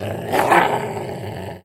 Goblin Scream
Goblin monster attacking, threatening the RPG player character in a video game sound effect.
freedink, dog, bark, role-playing, goblin, game, rpg, angry, scream, enemy, snarling, video-game, aggressive, beast, growl, barking, creepy, growling, monster, roar, dink, snarl